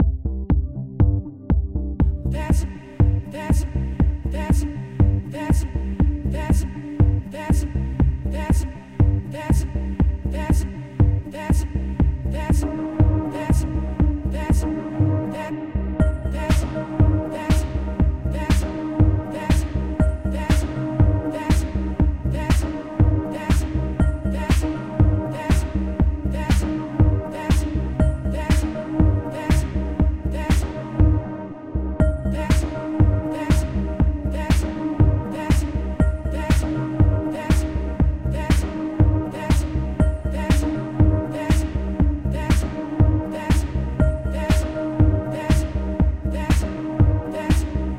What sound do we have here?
Electronic dance loop 02.
Synths:Ableton live ,Reason,Silenth1.